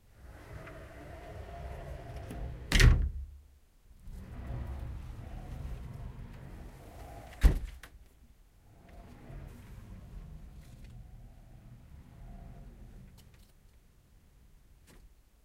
Slicing door on a wardrobe. Moving the door and bump to a wall.